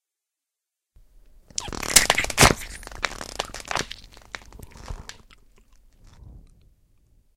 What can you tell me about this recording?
A short sound of a bone ripped apart.
accident, bone, break, breaker, crack, crunsh, flesh, fracture, gore, nasty, rip, wet